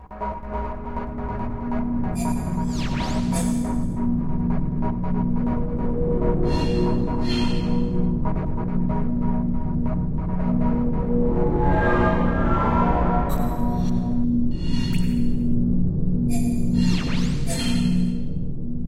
Uses 5 parts: 1 that plays an excerpt of the sample at different
pitches with no other modification, another that plays different short
chopped pieces of the sample, and three scratches of the sample.
Similar spooky sound the the original sample.
creepy, scary, spooky